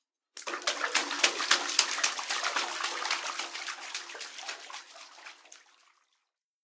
A fish swimming away with a fade out
Foleyd in my bathtub with some unfortunate echo
away, fade, fish, leaving, splash, splashing, swimming, water